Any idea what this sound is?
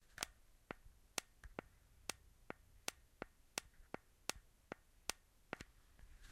The sound of a Midland 75-785 40-Channel CB Radio talk button being pressed, no power.